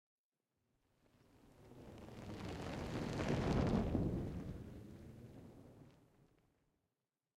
21 FIACCOLA PASS
torches, effects